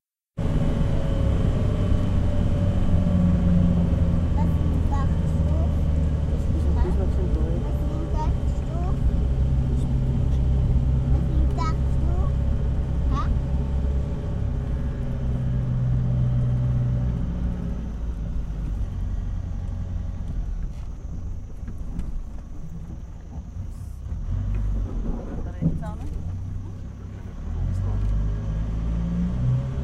Recorded in Bangkok, Chiang Mai, KaPhangan, Thathon, Mae Salong ... with a microphone on minidisc
thailand, street, machines, temples